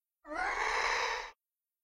An avian monster, or something else entirely.
Recorded into Pro Tools with an Audio Technica AT 2035 through the Digidesign 003's preamps. Some pitch shifting and layering.
bird, monster, growl, avian, beast, roar, creature, monstrous